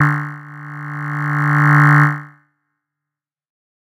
This is one of a multisapled pack.
The samples are every semitone for 2 octaves.
tech, noise, pad